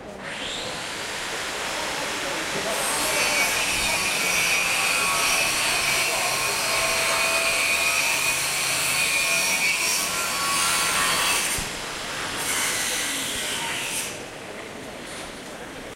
While recording in the market Boqueria we encountered some work-men who were cutting steel inside the boqueria. Lot's of background noise.
barcelona, boqueria, field-recording, market, mettal-cutter